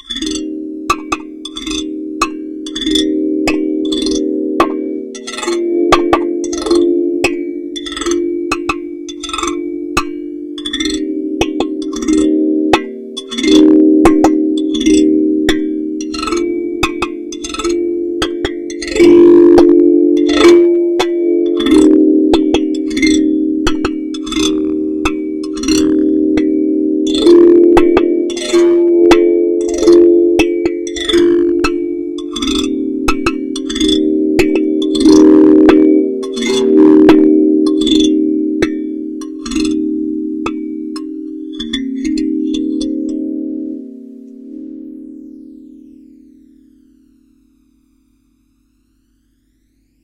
Few tones on african kalimba. Could be used as refrain in a song, for example.
romantic, refrain, sequence